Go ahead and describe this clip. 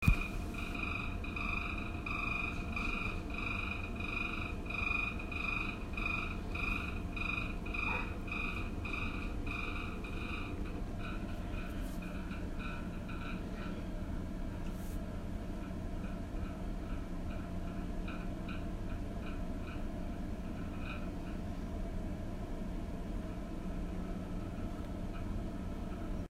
This is the sound of the broken fan at the bathroom in my friends house. It sounds a lot like a cricket, but I can assure you it’s a machine- once again this was straight off my phone so sorry if the quality is pretty bad. I hope this can still be of use to you. You don’t need permission but I would like to know if anyone does use it, just for the sake of curiousity :)